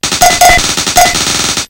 These are TR 505 one shots on a Bent 505, some are 1 bar Patterns and so forth! good for a Battery Kit.
beatz, circuit, distorted, bent, higher, hits, 505, glitch, hammertone, a, oneshot, than, drums